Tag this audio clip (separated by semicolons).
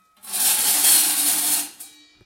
Bang; Boom; Crash; Friction; Hit; Impact; Metal; Plastic; Smash; Steel; Tool; Tools